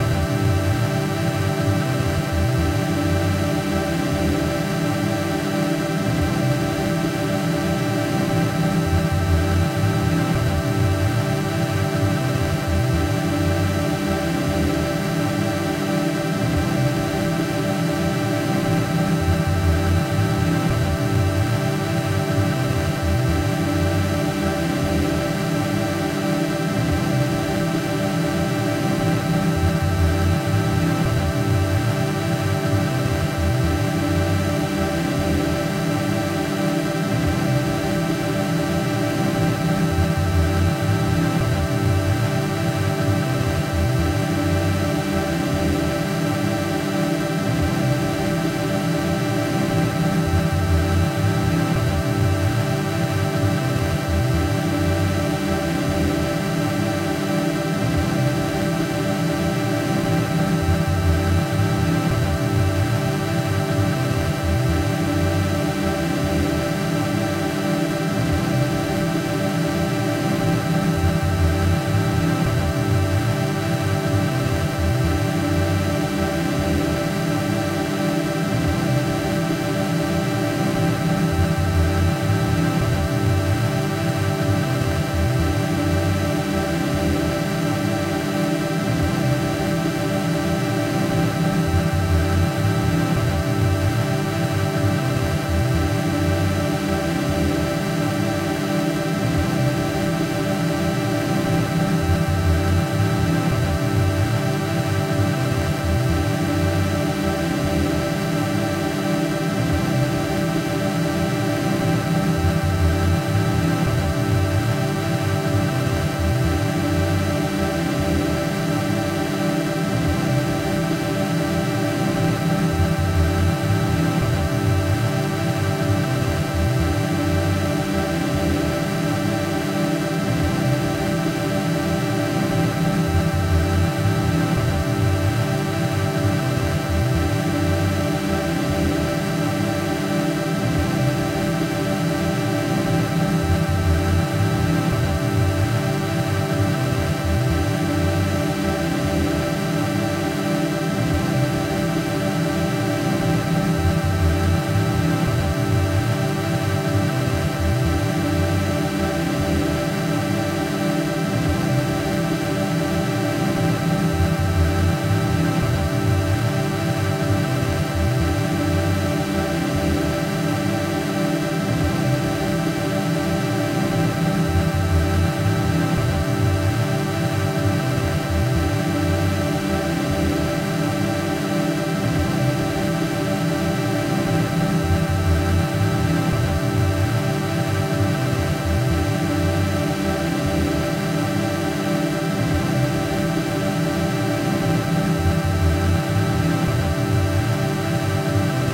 Just an organ pad with several processors to create a texture.
Sound-Design, Atmospheric, Pad